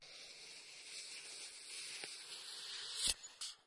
Queneau frot metal 22
prise de son de regle qui frotte
clang, cycle, frottement, metallic, piezo, rattle, steel